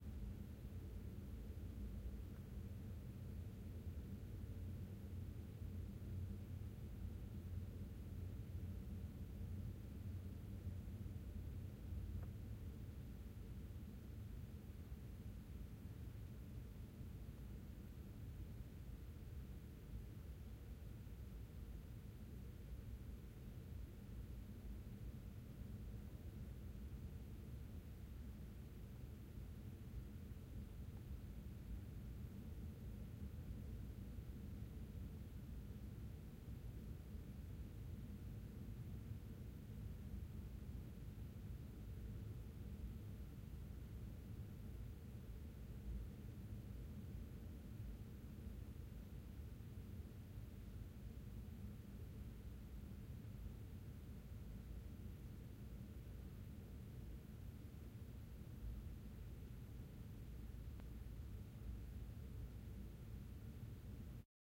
Hyundai Kona electric car - interior - car turning off - silence
vehicle, hyundai, electric, car, automobile, motor, transport, auto, ev, kona, transportation, engine